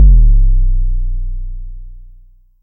Vermona Kick 7
From the KICK Channel of the Vermona DRM 1 Analog Drum Synthesizer